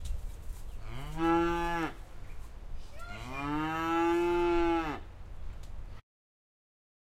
OWI Cow Moo
To get the sound of the cow mooing was really hard, but with a lot of patience and recording for quite a while, we finally got it.
bull, moo, cow, cattle, farm, cows, milk-cows, OWI, mooing, herd